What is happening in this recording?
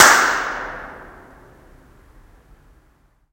Tunnel 1 Impulse-Response reverb clap closeby high-pitch clap
1, clap, closeby, high-pitch, Impulse-Response, reverb, Tunnel